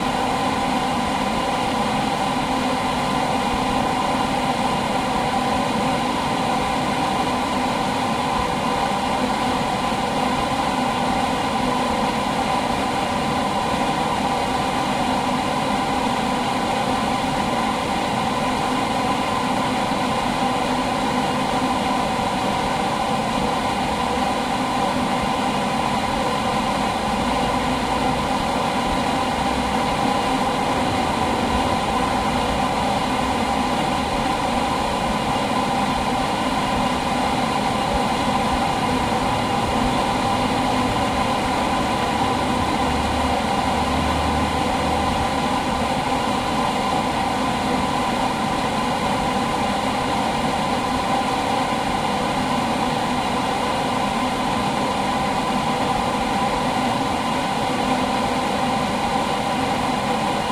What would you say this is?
air conditioning 7
Hum of air conditioning split-system (outdoor part).
Recorded 2012-10-13.
noise, Omsk, split-system